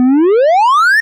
jsyd mario slide
Synthesized version of a sound effect from Super Mario brothers. I analyzed a recording of the original sound and then synthesized a facsimile using my own JSyd software.